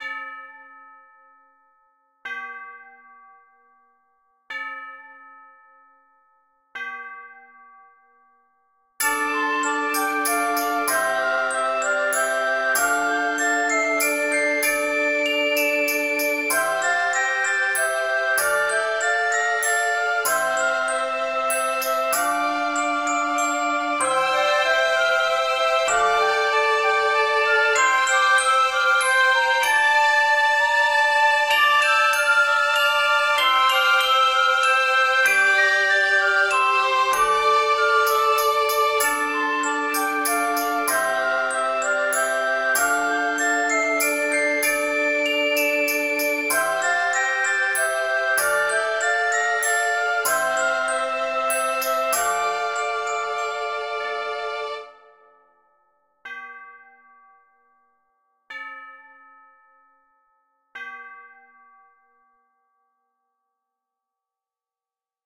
Mysterious World - Glittering Crystals
The ever colder days have inspired me to create this musical mood. You can also imagine the snowy landscape glistening in the moonlight. I composed it in MuseScore.